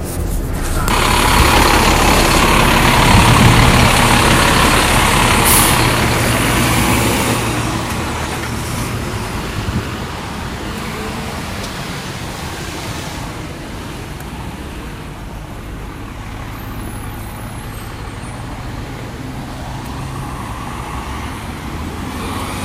Recorded during a 12 hour work day. Getting off the bus, hitting record, and walking around the back as it drives away.
bus, field-recording, public, transportation